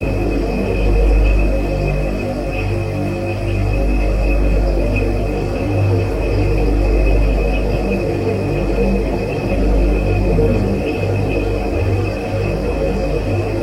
fridge-and-some-bg-after-chorus
Fridge engine sound in a not-so-quiet place. Recorded by Nokia 700 phone; chorus fx after all.
horror, lo-fi, refrigerator, fridge